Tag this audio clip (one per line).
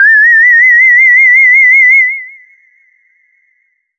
horror
multisample
reaktor
whistle